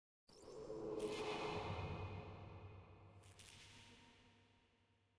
Bionic electrical whoosh made using various samples mixed together in a sequencer.